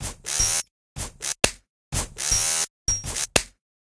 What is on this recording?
HouseHold 125bpm01 LoopCache AbstractPercussion
Abstract Percussion Loop made from field recorded found sounds
Abstract, Percussion